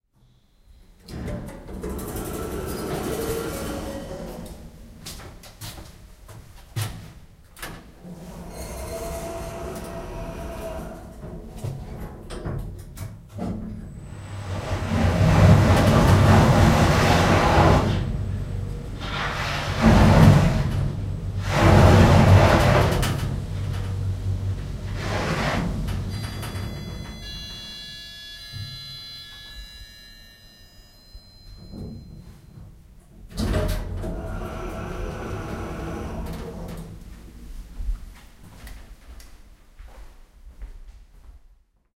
Sound of a noisy Elevator. Doors closed and elevator goes from the second floor to the ground floor. Recorded with Zoom H1.
broken, close, closing, door, doors, elevator, floor, lift, noise, open, opening